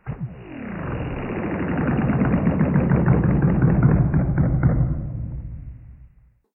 Strange engine failure 01
This sound is created from creaky sound recorded by Sony IC Recorder by apply Delay:
Delay tipe: boucing ball
Delay level per echo: -1,00 dB
Delay time: 0,001
Pitch change effect: pitch/tempo
Pitch change per echo: 1,000
Number of echoes: 30;
and Pitch/speed -85% and +25%